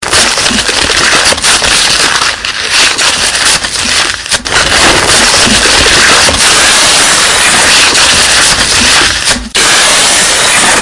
TCT soundscape MFR rose-enthan-manuel
Sounds from objects that are beloved to the participant pupils at La Roche des Grées school, Messac. The source of the sounds has to be guessed.
France, messac, mysounds